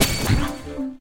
STAB 071 mastered 16 bit
Electronic percussion created with Metaphysical Function from Native Instruments within Cubase SX. Mastering done within Wavelab using Elemental Audio and TC plugins. A weird spacy short electronic effect for synthetic soundsculpturing. Almost usable as a loop on 60, 90 or 120 bpm...